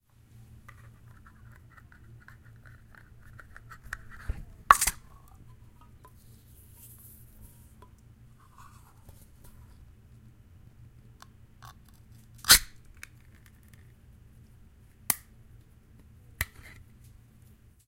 Easy Open Can
University, Elaine, Park, Field-Recording, Koontz